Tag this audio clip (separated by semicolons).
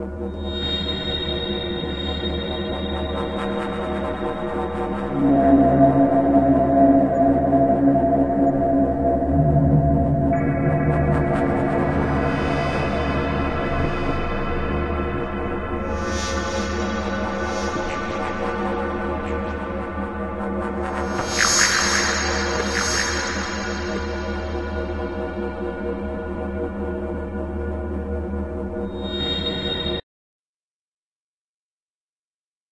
rithmdrone; dark; eerie